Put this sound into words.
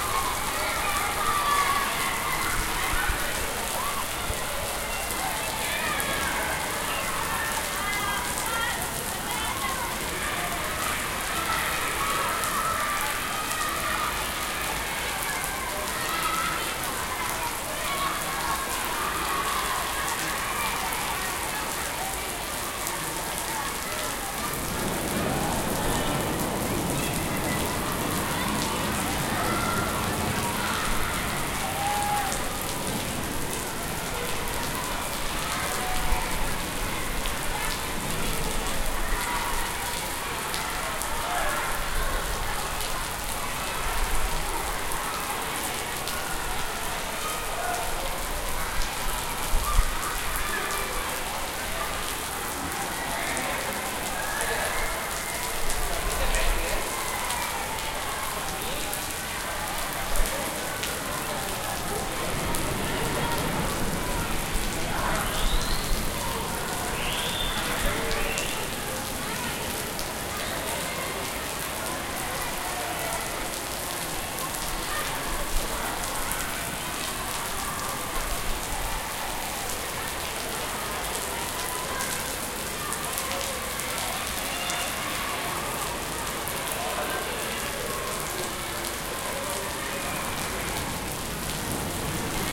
Amb - Pluja i nens
This is a recording of a rainy day, and a lot of children shouting at the distance.
child, rain, shouts, storm